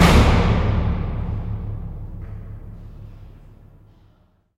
Door Slam 1
There's a staircase where my college radio station is and this door slam gets me every time - metallic slam with big, booming reverb. I posted another version that includes an additional door clicking sound at the beginning, it's called "door slam 2".
closing, reverb, slam, close, door, slamming